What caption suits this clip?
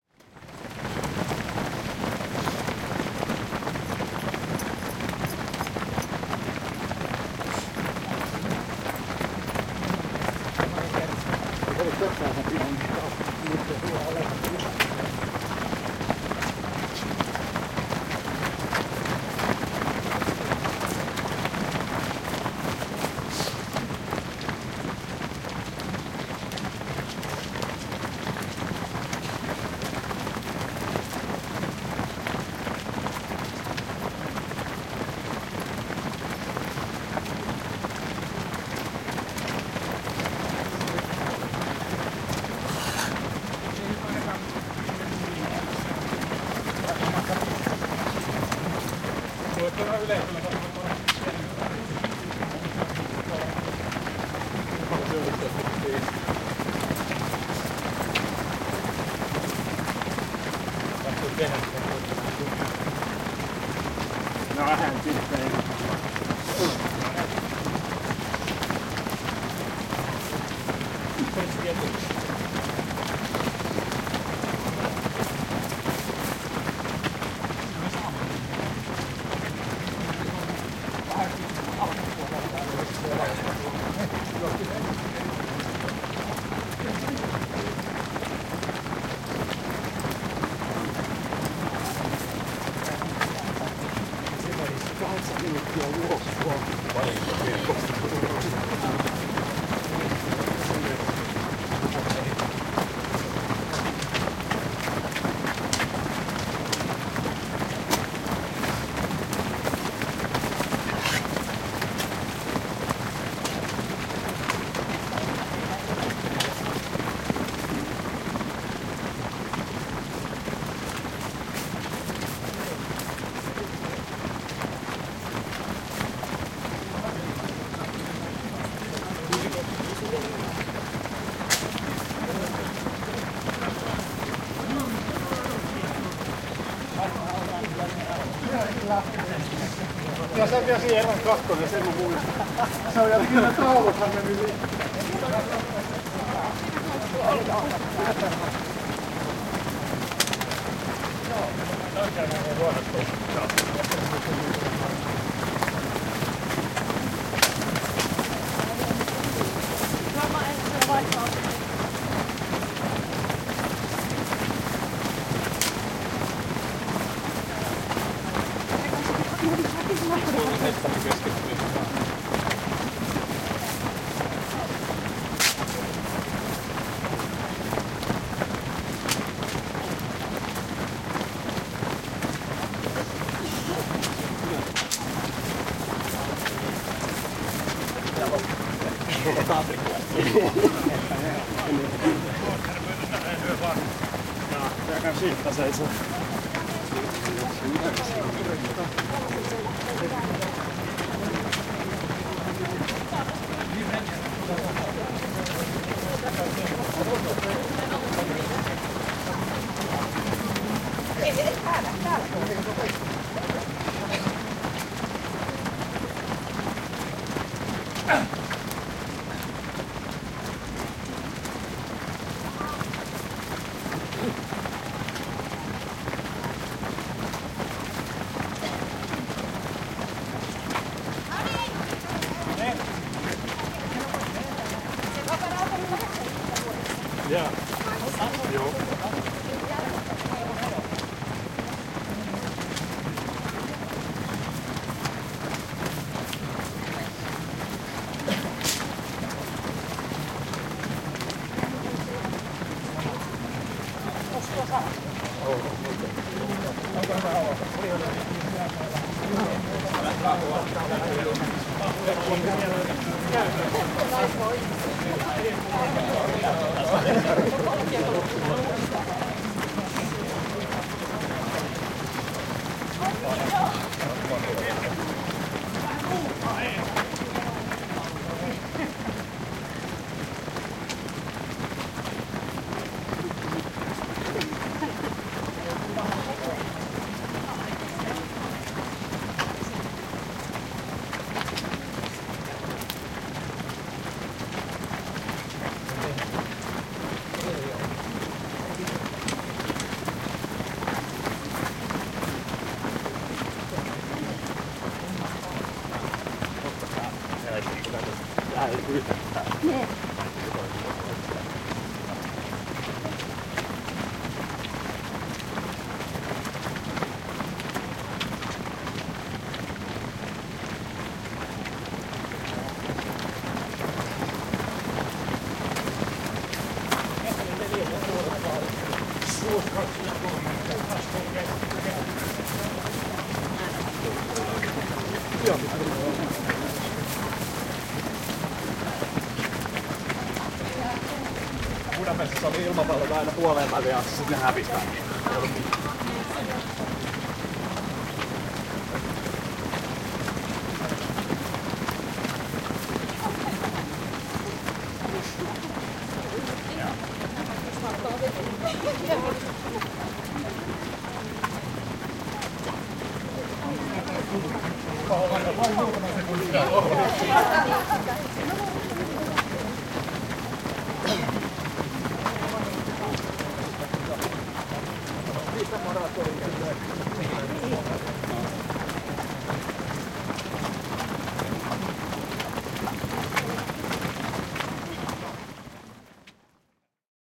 Helsinki City Maraton 2015. Juoksijoita ohi jatkuvana massana, ryhmiä. Askeleita, juoksuaskeleita, puhetta. Muovisia vesimukeja pudotetaan maahan.
Äänitetty / Rec: Zoom H2, internal mic
Paikka/Place: Suomi / Finland / Helsinki
Aika/Date: 15.08.2015